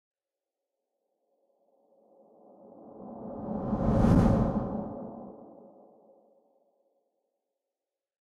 A synthetic Whoosh sound that can be used for movement for a swing or slow motion effect.
wish, whoosh, air, motion, slow, swing, woosh, sound, swoosh